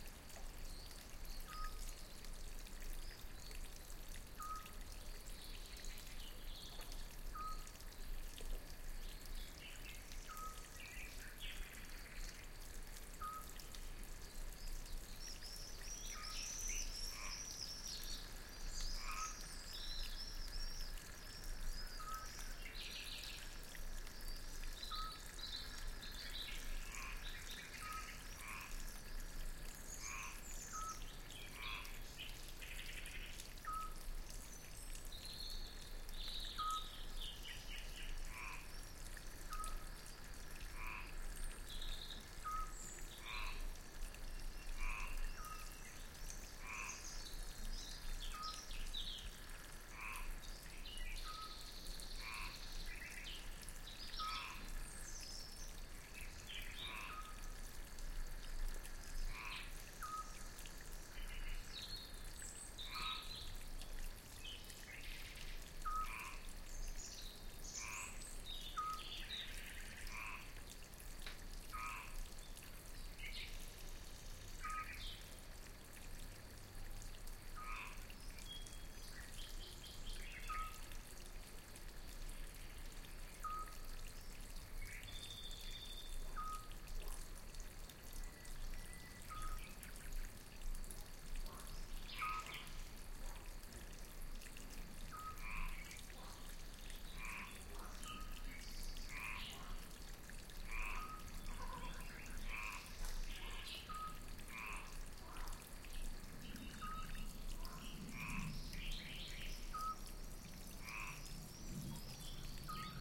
Country Fountain birds frogs MSd01
Field recording of a nice place in a small village in Gers. France. Light running water in a "lavoir". beautiful sounds of birds and frogs all around. recorded using a Schoeps MS microphone in a Fostex PD4. decoded in Protools.
fountain, country, light, birds